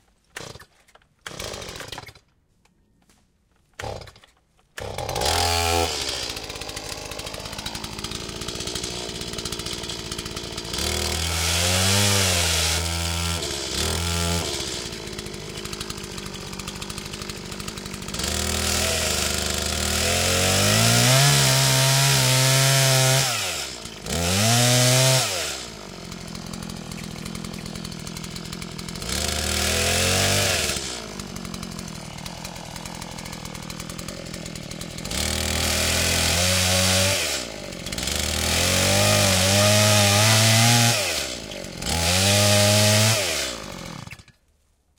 chainsaw small engine turn on and sawing from small distance stereo M10
This sound effect was recorded with high quality sound equipment and comes from a sound library called Chainsaw which is pack of 111 high quality audio files with a total length of 116 minutes. In this library you'll find recordings of chainsaw captured from different perspectives.
ambient, chain, chainsaw, distance, effect, engine, gas, mechanic, mechanical, medium, off, rpms, saw, sawing, small, sound, turn